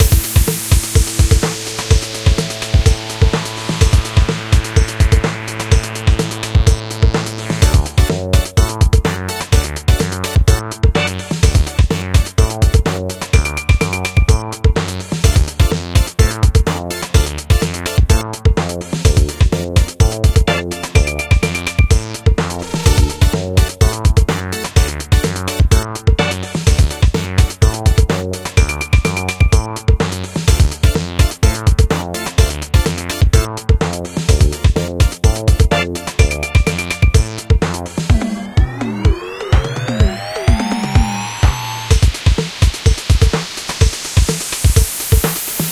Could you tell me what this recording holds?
Cool Loop
Looped music with samples of the 80's LinnDrum, analog bassliner emulation and some organs. I used reverb, high pass, automation and more effects too. Made in LMMS 1.2.0. _ BPM: 126 _ Chords: D major and D minor. You can use my loop freely but please send me the link in comment of your creation what contains my sound =-)
126-bpm, analog, automation-effect, cool, d-major, d-minor, drum-machine, effects, linn-drum, lmms, loop, music, organ, retro, synthesizer